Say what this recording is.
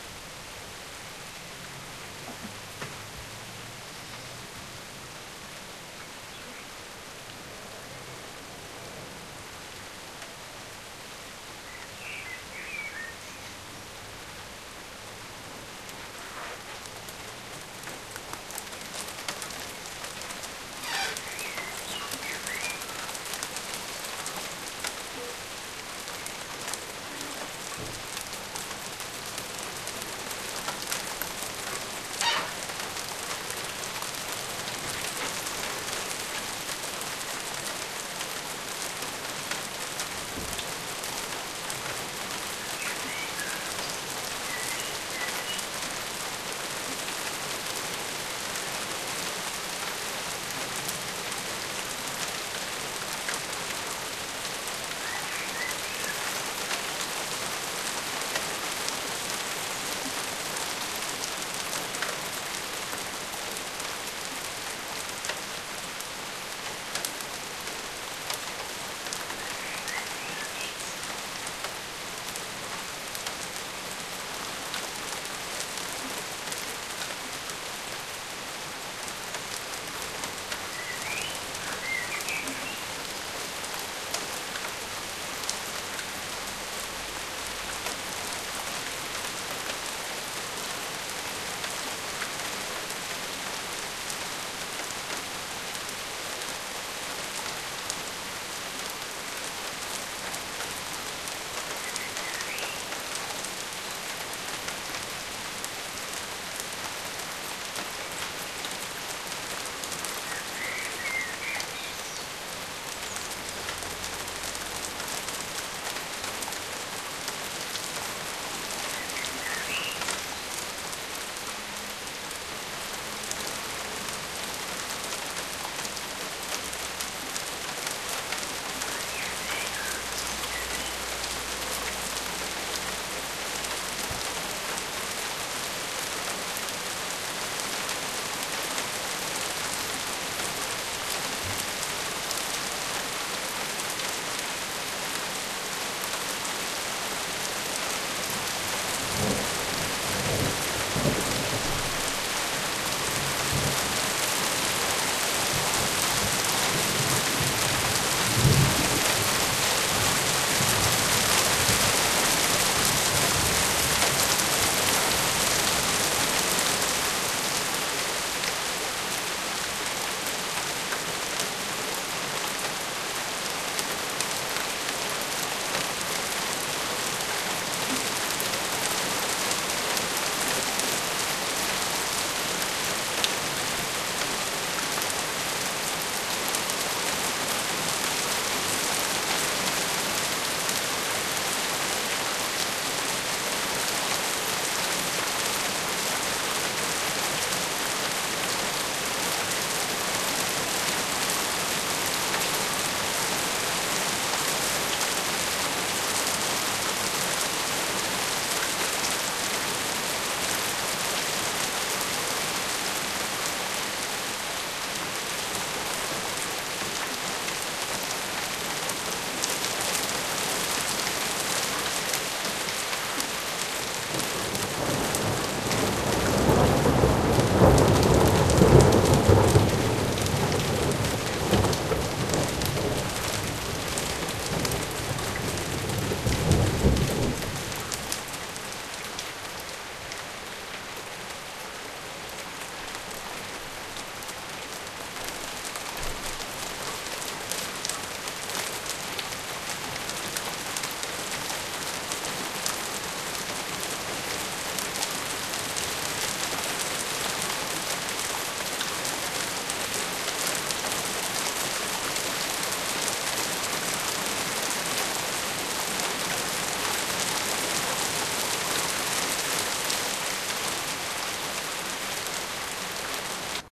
Short break in a two hour thunderstorm, with a blackbird starting to sing,
then heavy rain and distant thunder, recorded at the veranda of my house in a
suburb of Cologne, Germany, June 1997, late afternoon. Stereo, Dat-recorder.
water, weather, storm, rain, lightning, ambient, nature, thunder, field-recording